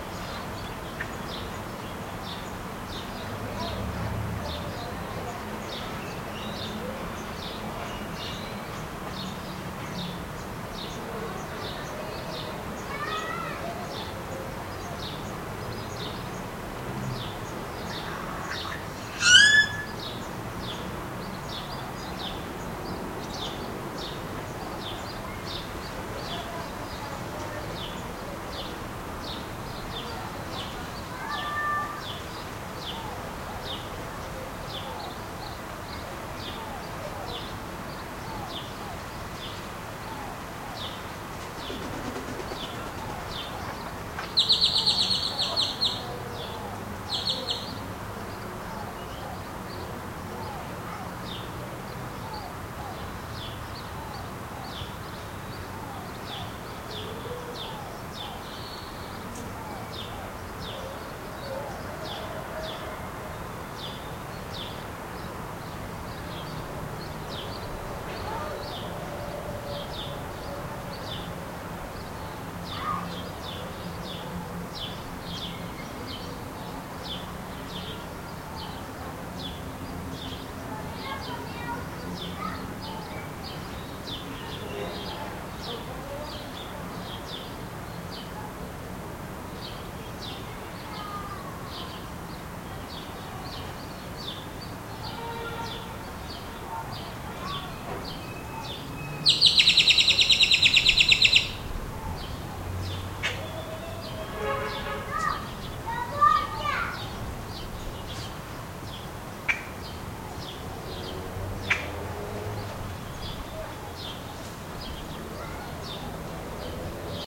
Sunny day outside Dia soleado exterior
Sunny day outdoor in spring in a city